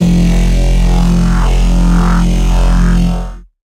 ThickPrimeWTBassF0160bpm
Thick Prime WT Bass F0 - Serum wavetable bass with wavetable sync and quantize modulation, some noise mixed in and multi band compression. Similar to Thick Locust WT Bass (also in the pack) this one has more depth and is less up front & in your face.
Loud, 160bpm, 140bpm, 170bpm, Bass, Synth